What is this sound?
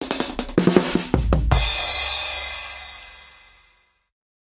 dnb drumloop end 2bars 160bpm
DnB acoustic drumfill/ending, 2 bars at 160bpm.
Originally played/recorded in 140bpm with one mic only (Rode NT1A)
Processed to give it a trashy and agressive vibe.
drumloop, n, 160bpm, drums, drum-loop, dnb, groove, rhythm, breakbeat, drum, break, drumgroove, bass, beat, loop